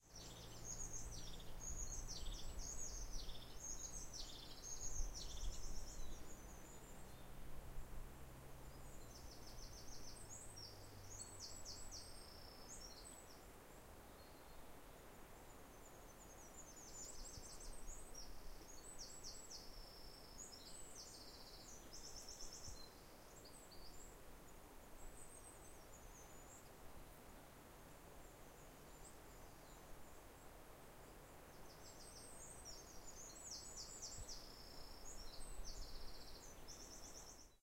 birds distant driving nature
Hiking in the pyrenees (mountains in Catalonia) recorded birds. I was close to a road so the sound of a car driving by in a distance is present.
ambiance, birds, car, driving, field-recording, mountain, pyrenees, road